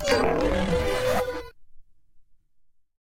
Processed Balloon Sequence
Sound of stroking a balloon processed with melodyne.
Might work as some kind of alien Voice :)
talk, voice